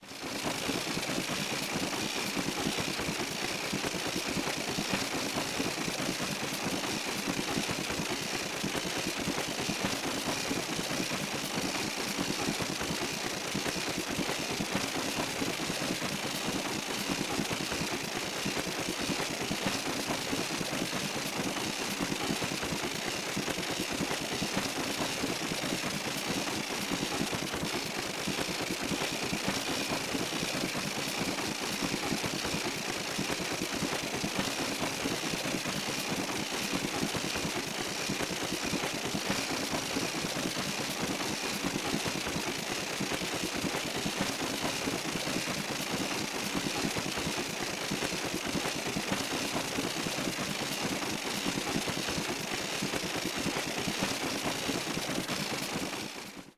This is a combination of a percussion ratchet and a spinning cloth belt. It can be used as a sound for a toy or any fun whirring thing.